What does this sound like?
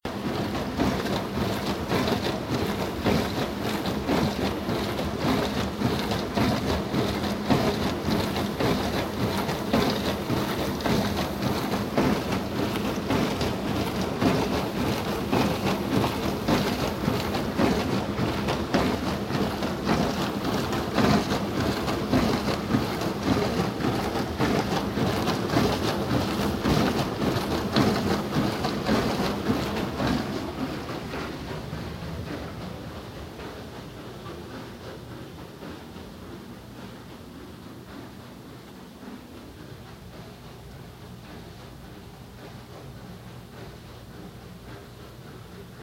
dishwasher swishing
A dishwasher that made some pretty noisy sloshing sounds. Very interesting rhythm.
swish, dishwasher